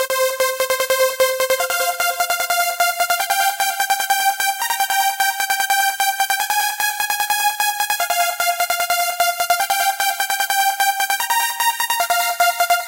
Riser 1 Flicker
Sequence of saw-type synth. 150 bpm